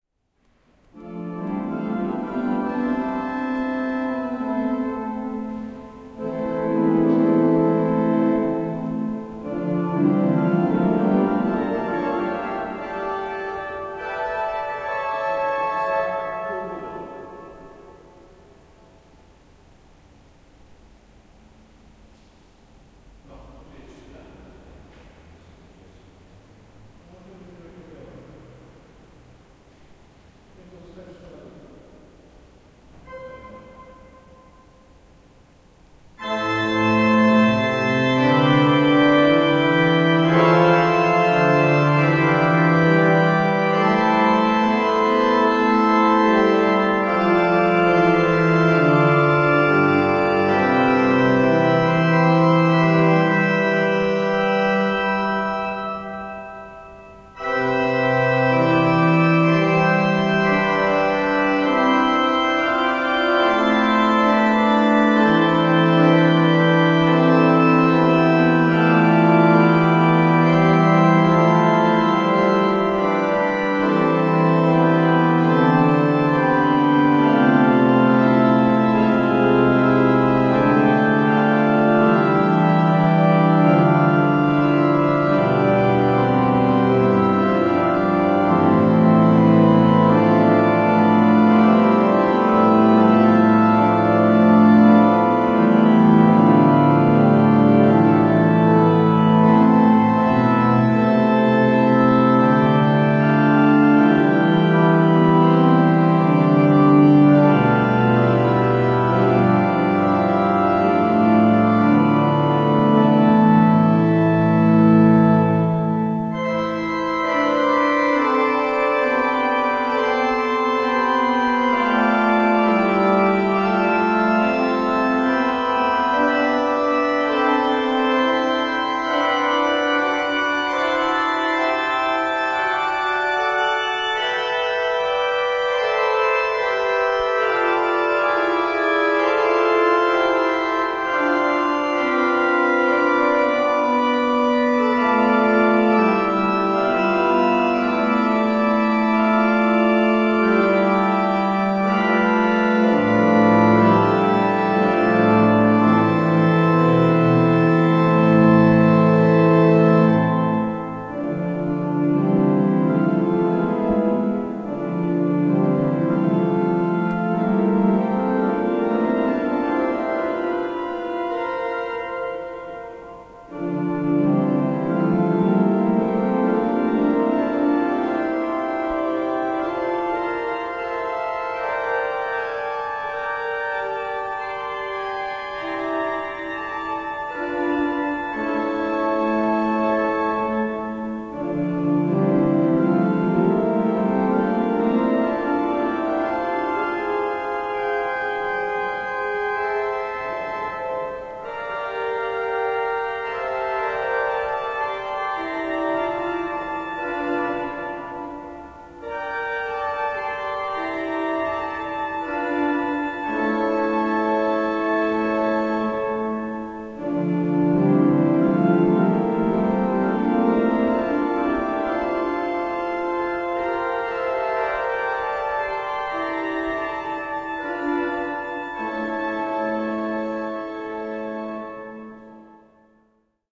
07.05.2011: about 11.40. pipes in the Zmartwychwstania Church on Dabrowki street in Poznan/Poland.